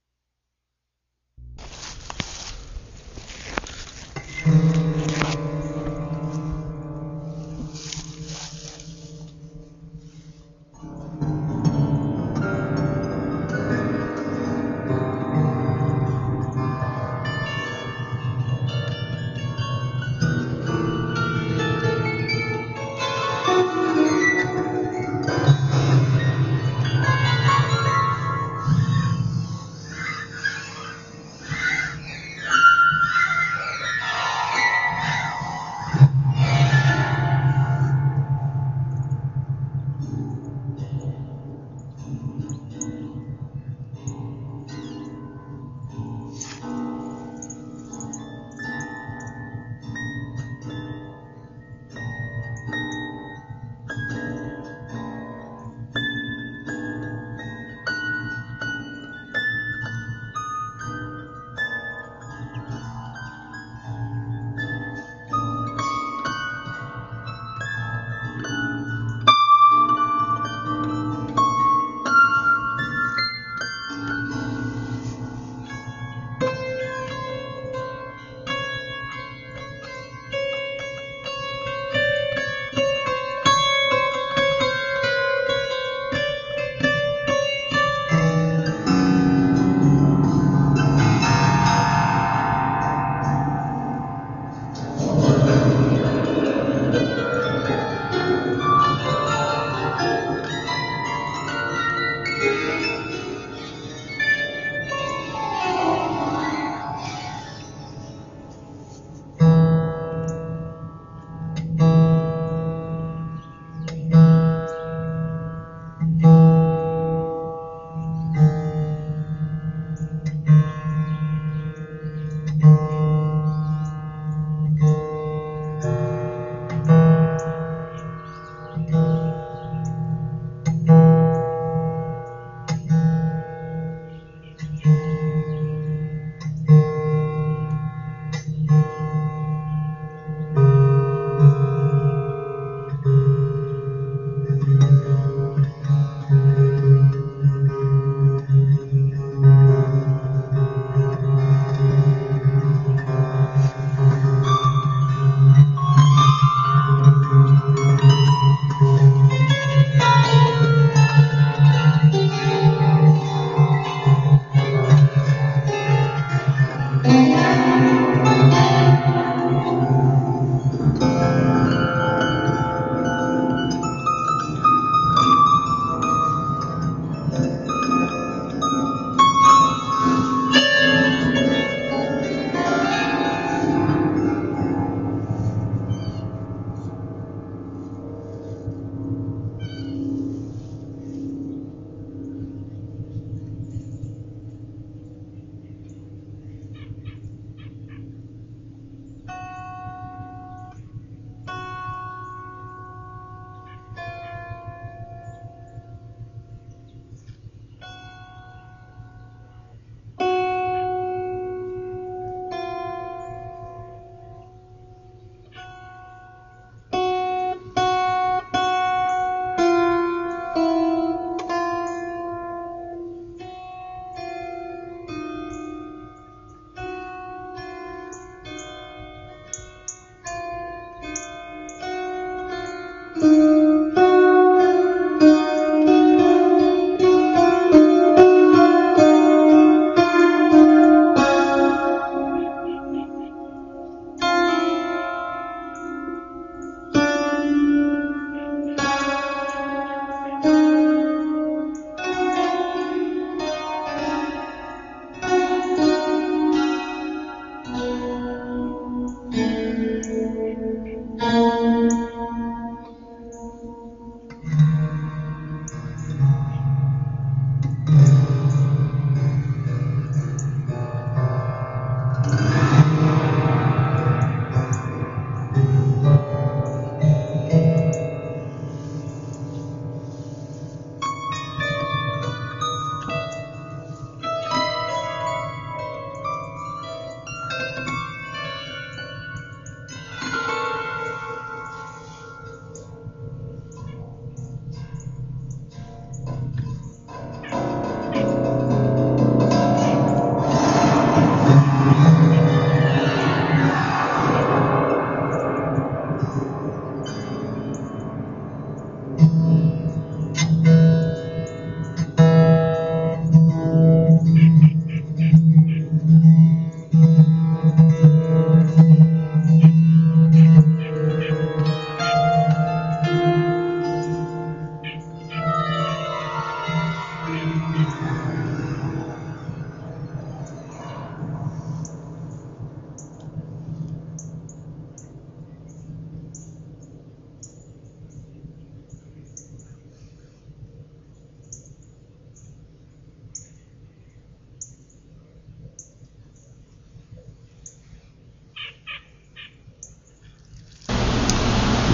So my neighbor was given a piano and when he was driving it home it flipped out of the truck and shattered on the road. He gathered up the pieces and unloaded them behind my house. The piano guts or harp leaned up against a tree and tempted me for days. I took my minidisc recorder out one day and strummed the strings. This is a raw unedited recording which I hope to use for a composition someday. Enjoy!